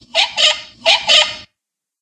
The Big Squeak (20) Screwing 1
Okay, about this small bibliothek there is a story to tell.
Maybe a year ago my mother phoned me and asked if I could give her a hand because the door to her kitchen was squeaking.
After work I went to her, went down to the cellar, took the can with the lubricating grease, went upstairs and made my mother happy.
Then I putted the grease back and went upstairs again. Whe sat down, drank a cup of coffee. Then I had to go to the toilet and
noticed that the toilet door was squeaking too. So I went down to the cellar again and took once again the grease.
Now I thought, before I make the stairs again, I'll show if any other thing in my mothers house is squeaking.
It was terrible! I swear, never in my entire life I've been in a house where so many different things were squeaking so impassionated.
First off all I went back to my car and took my cheap dictaphone I use for work. And before I putted grease on those squeaking things I recorded them.